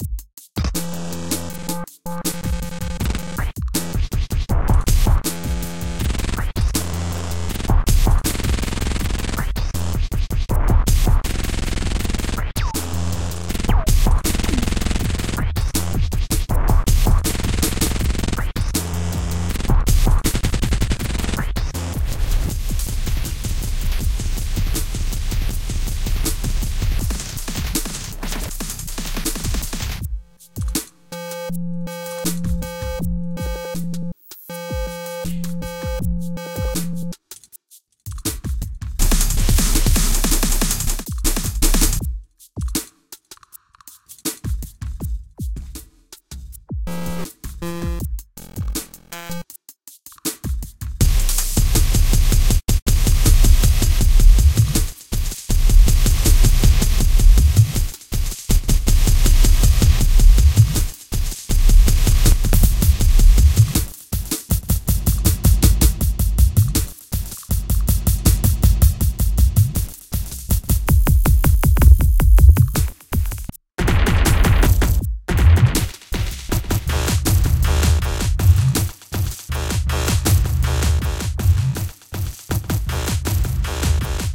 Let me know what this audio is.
Cloudlab-200t-V1.2 for Reaktor-6 is a software emulation of the Buchla-200-and-200e-modular-system.
2, 6, Emulation, Instruments, V1